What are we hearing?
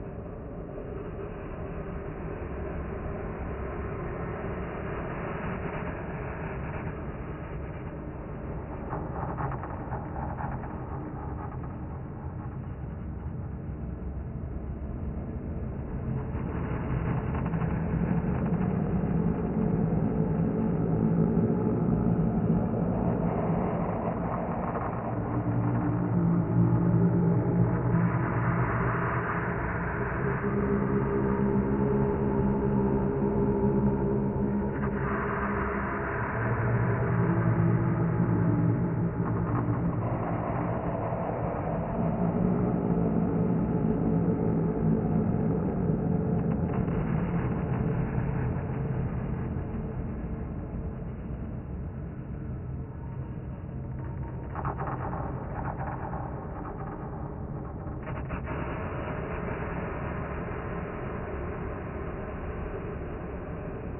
slow dark granulated drone sound with lots of delay and reverb, more low tones
dark, drone, menacing, spooky